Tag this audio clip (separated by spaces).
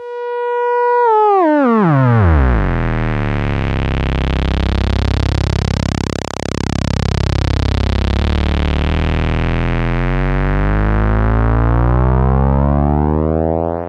benjolin circuit electronic synth noise hardware analog